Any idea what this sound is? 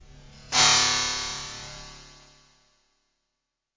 Artificial Simulated Space Sound 03
Artificial Simulated Space Sound
Created with Audacity by processing natural ambient sound recordings
ambient, atmosphere